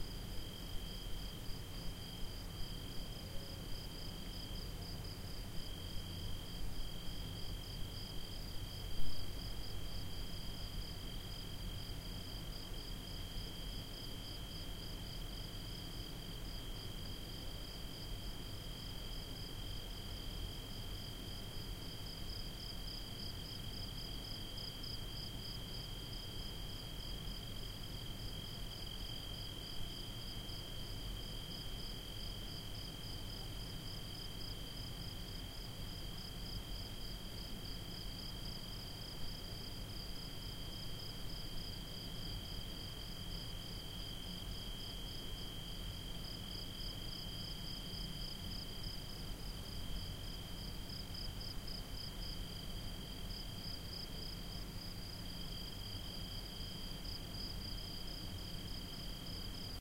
Crickets singing in a dune at night. Recorded in Olhão, Portugal, 2017, using a Zoom H1. Minimal processing for reducing bass rumble and increasing gain.
field-recording, night